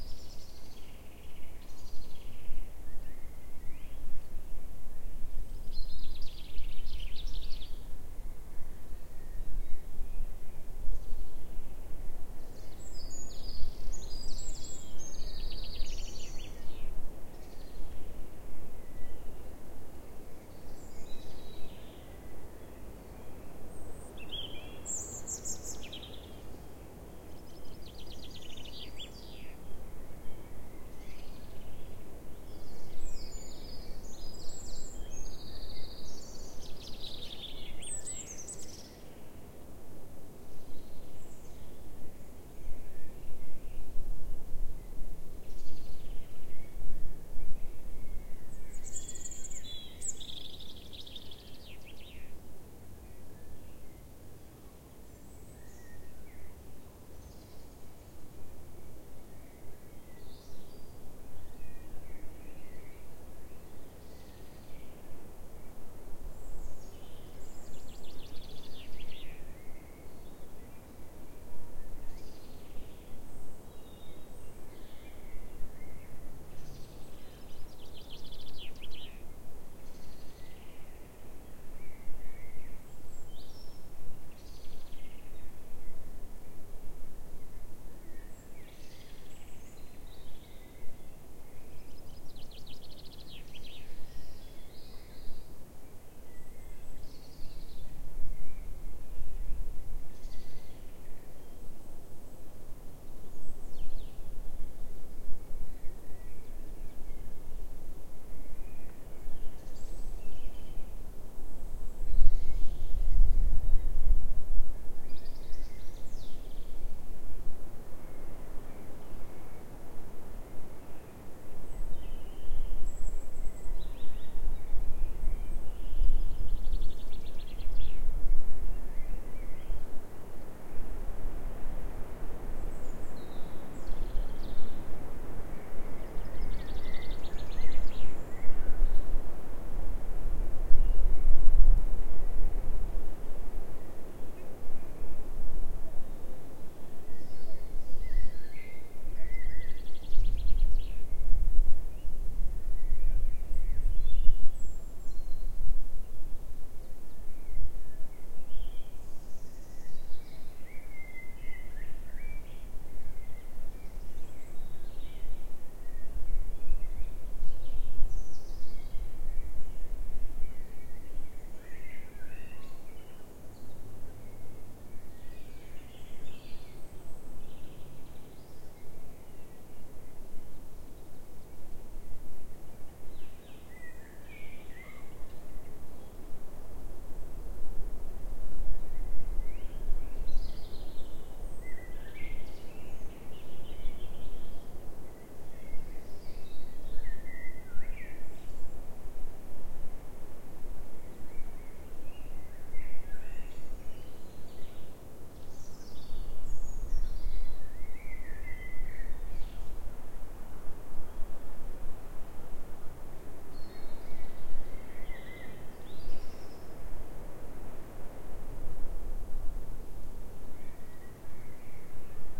UK Deciduous Wood in early Spring with Cuckoo
Unprocessed wildtrack audio recorded with a Tascam DR-22WL with a Rycote softie on the ground in a woodland on the New Forest in early spring. Leaves were just starting to come out on the trees and this was recorded at 8PM in the evening, as the light was starting to fade. The surrounding vegetation was mostly large willow trees, growing up around a small stream, but the hills either side of this valley were heath/moorland. A distant cuckoo (one of the first of the year) can just be heard in some of the recording. There is some noise from the wind through the trees above, but no wind noise due to the softie protecting the stereo mics.